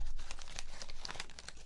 Sound of a paper being crumbled.